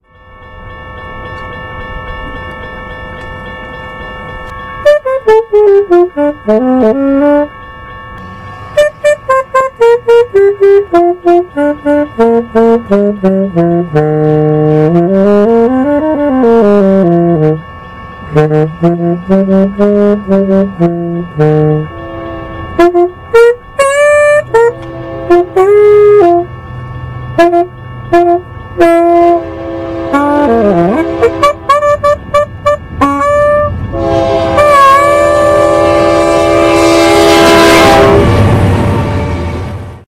Mini disc recording,Playing sax to the train,at flagstaff.